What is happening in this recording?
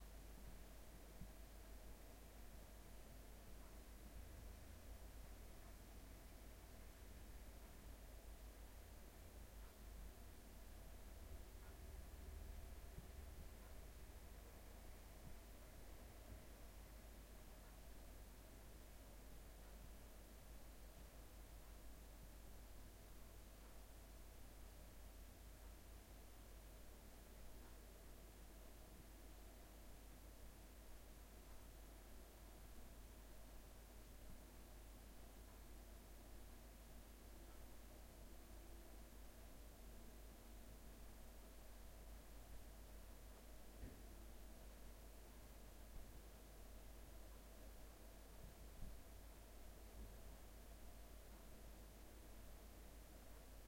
Living Room Room Tone
Ambience in a living room/lounge in a quiet suburban area. Suitable for small to medium room tones. Recorded with a Zoom H4N.
ambience, room-tone, lounge, field-recording, ambient